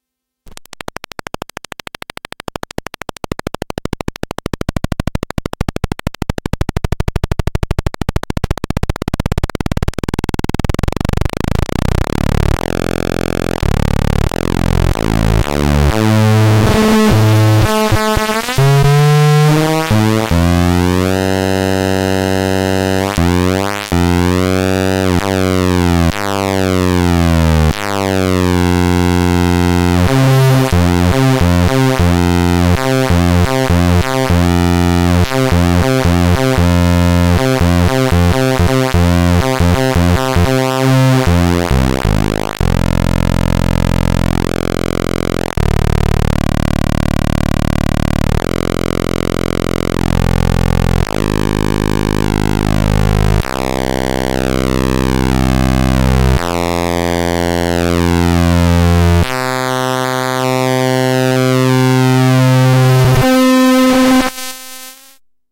Example sounds of Atari Punk Console (APC). The simple hardware implementation based on single NE556 integrated circuit.